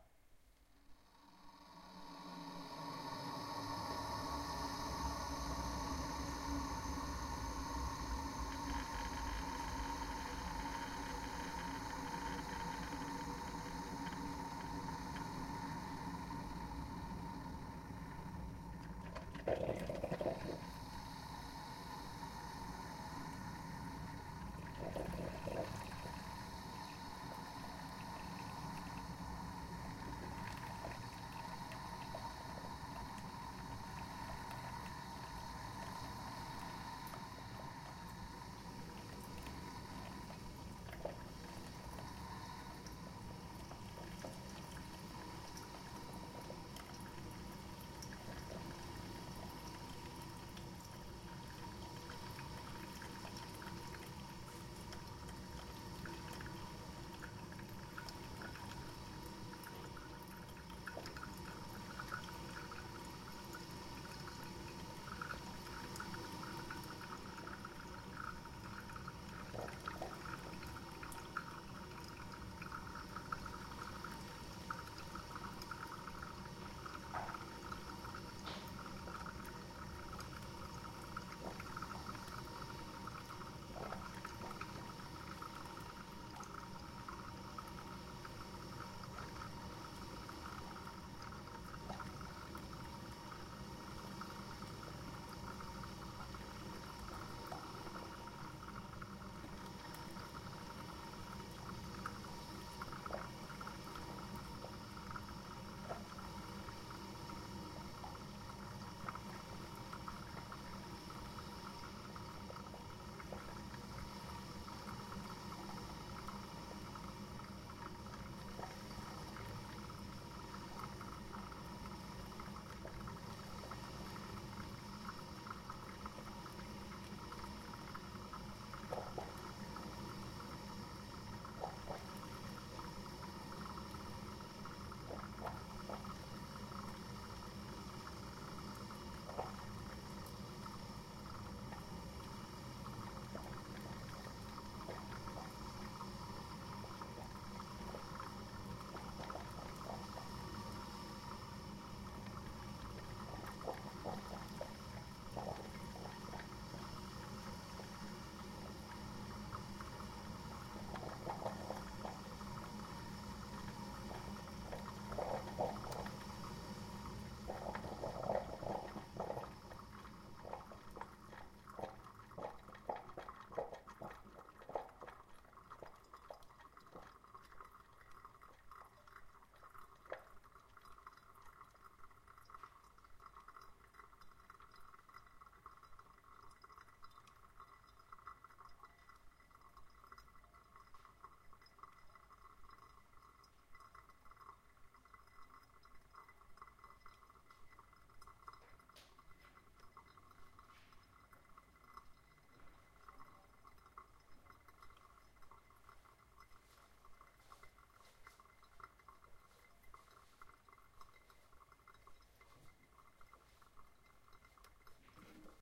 coffee maker making coffee
Sound of my coffee machine making coffee. Recorded with Zoom h1 in 2016.
coffee, machine, maker, Moccamaster, sound